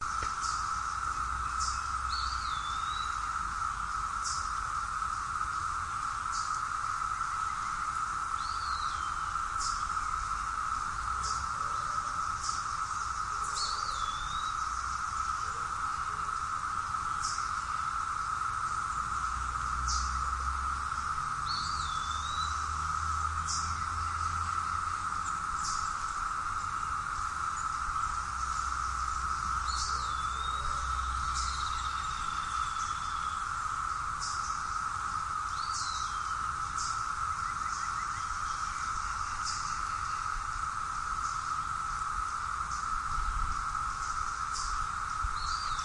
Evening birds and cicadas
cicadas in the evening with local birds Virginia